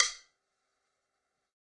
Sticks of God 016
real, stick